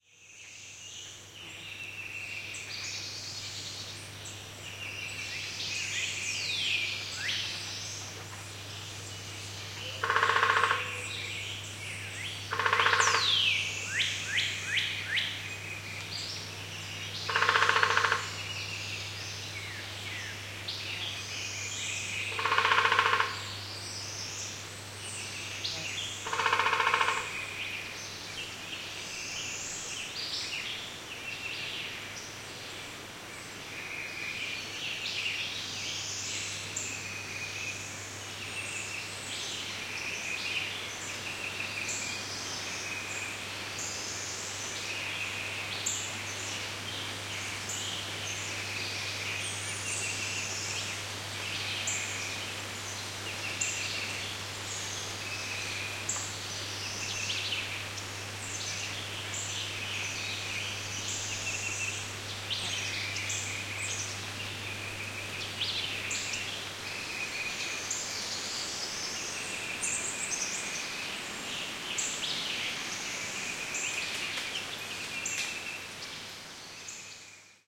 A sound rich recording of a swamp in Illinois. Recorded on Saturday June 25th, 2016 at 8:30AM using two Sennheiser ME66 microphones going into a Marantz PMD661. It was a very warm and humid when this recording was captured...watch out for the LOUD woodpecker knocking in the first 10 seconds of this sound-scape. Enjoy
birds, field-recording, insects, nature, summer, swamp, woodpecker-knock